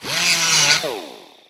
Straight die grinder - Grind 1
Unbranded straight die grinder grinding steel once.
work, 80bpm, 2beat, one-shot, metalwork, straight-die-grinder, labor, pneumatic-tools, pneumatic, grind, crafts, air-pressure, tools, motor